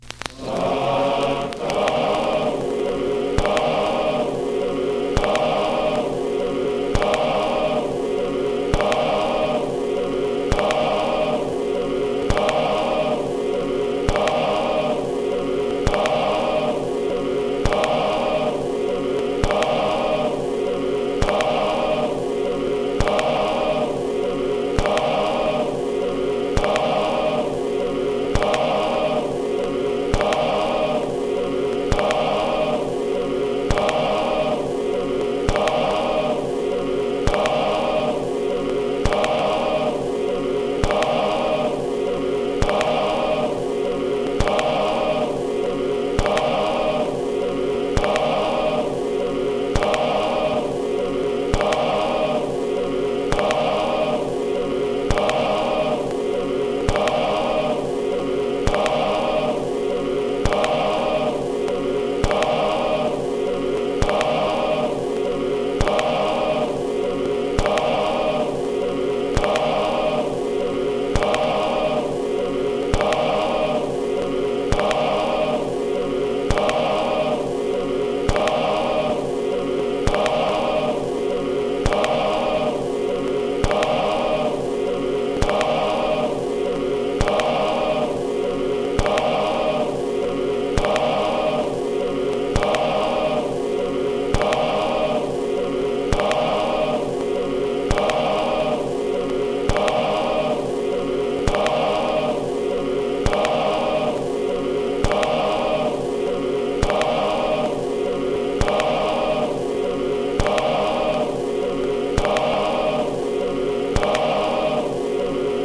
skipping vewdew
Record noise from a very old, warped and scratched up voodoo record from early last century digitized with Ion USB turntable and Wavoasaur.
loop, noise, phonograph, popping, record, scratch, skip, skipping, vinyl